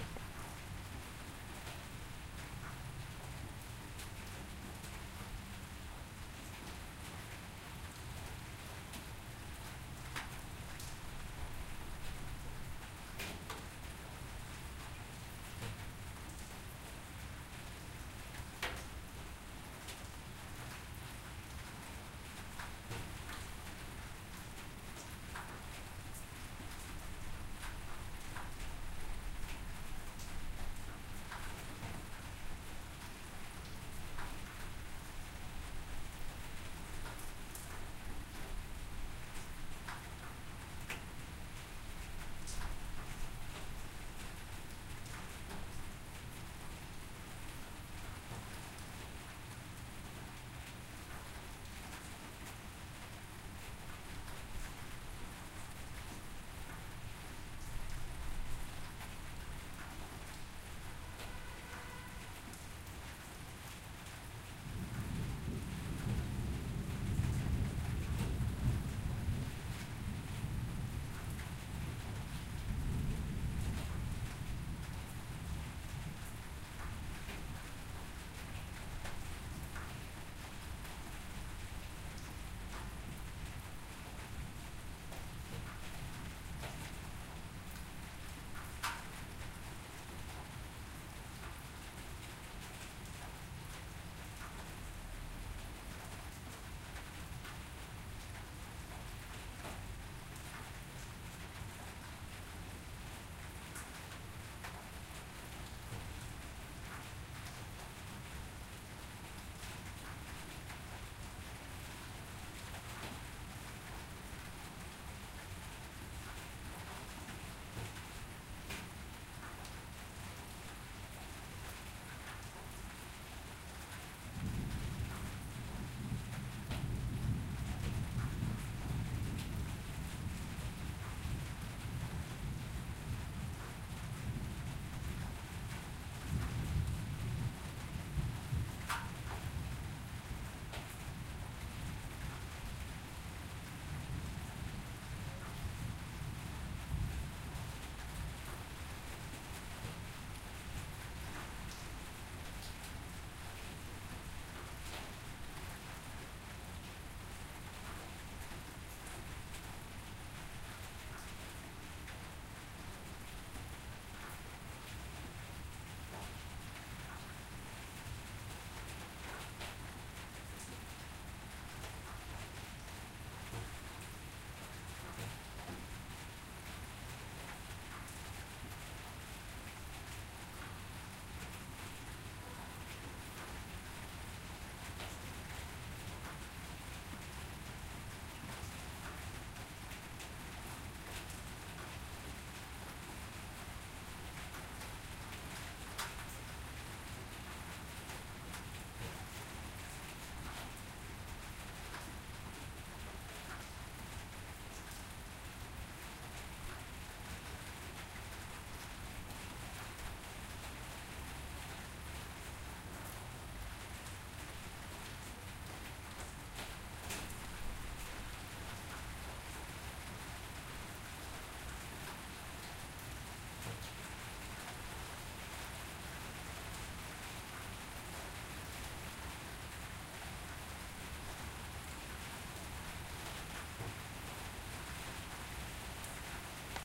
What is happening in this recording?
raining over metal surface ambience
ambience recorded with a H4N at night.